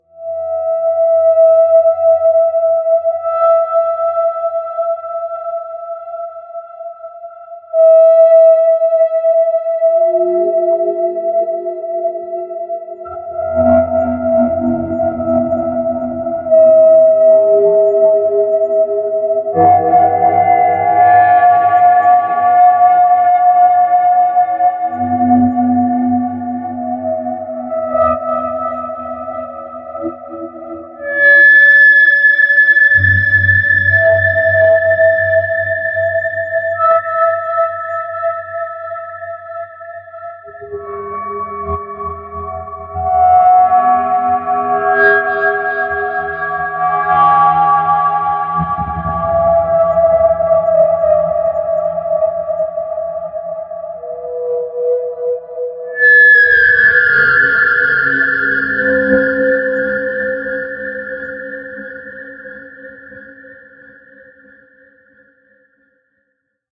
Samurai Jugular - 04
A samurai at your jugular! Weird sound effects I made that you can have, too.